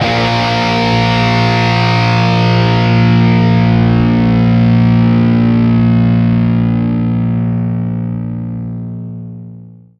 A#2 Power Chord Open